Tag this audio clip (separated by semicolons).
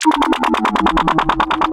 audio; beat; effext; fx; game; jungle; sound; vicces